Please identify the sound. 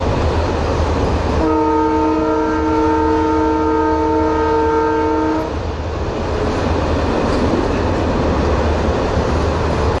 This is a recording of a train horn recorded from within a train in Serbia.
trains, horn, train